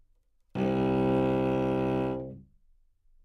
Part of the Good-sounds dataset of monophonic instrumental sounds.
instrument::cello
note::C
octave::2
midi note::24
good-sounds-id::4298
C2, cello, good-sounds, multisample, neumann-U87, single-note